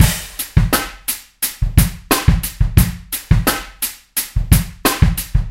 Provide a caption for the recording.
HipHop kit - straight beat 1 - small snare - compressed
A straight hip hop drum beat (w compression) played on my hip hop kit:
18" Tamburo kick
12x7" Mapex snare
14x6" Gretsch snare (fat)
14" old Zildjian New Beat hi hats
21" Zildjian K Custom Special Dry Ride
14" Sabian Encore Crash
18" Zildjian A Custom EFX Crash
beat, compressed, drum, Hip, Hop, kit, snare, straight